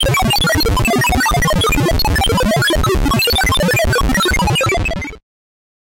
Computer Does Calculations 1 (Short)
Several high and low pitched beepings and boopings, like the sound of computers toiling away at their infinitely complex calculations. Good for sci-fi usage!
computer, machine, operating